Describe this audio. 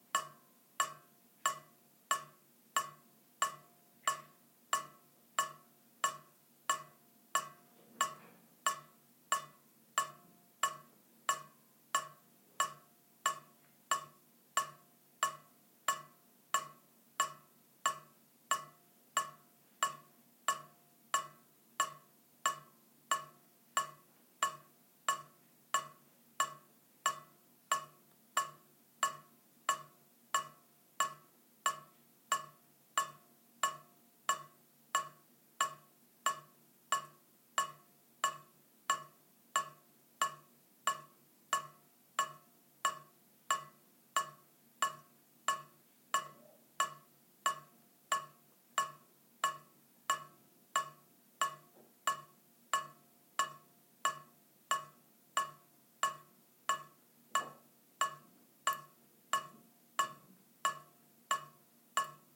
20110924 dripping.mono.13
dripping sound. Sennheiser MKH60, Shure FP24 preamp, PCM M10 recorder